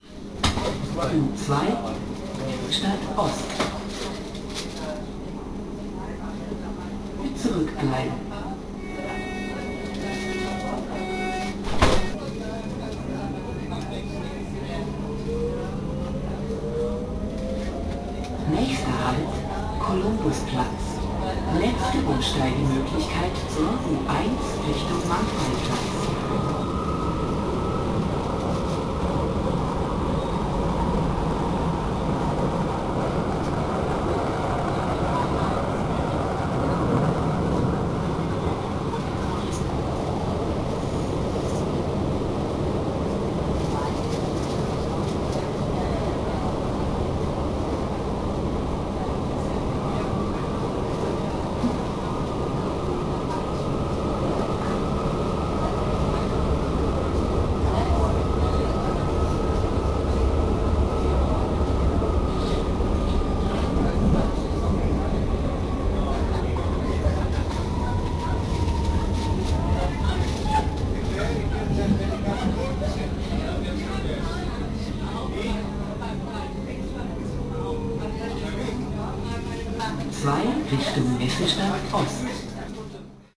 From summer 2008 trip around Europe, recorded with my Creative mp3 player.Subway ride and announcement in Munich, Germany